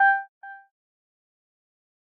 Simple free sound effects for your game!
blip, effect, game, sfx, videogame